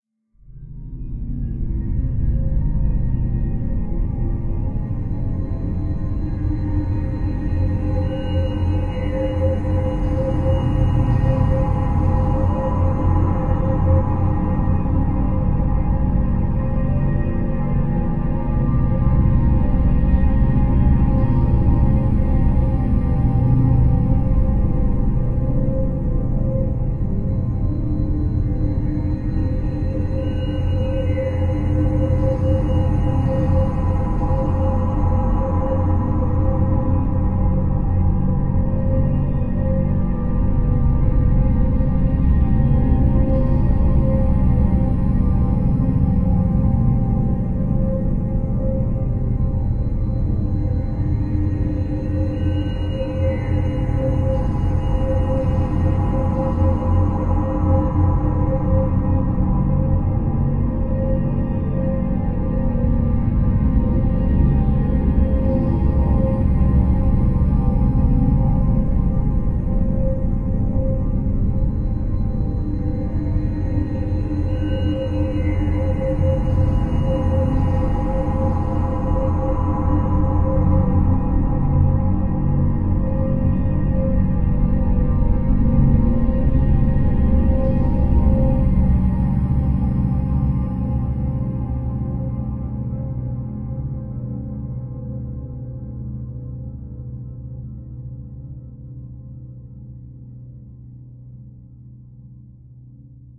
various samples played in octaves lower, and many effects.
space, artificial, atmosphere, ambient, multisample, experimental, drone, evolving, dark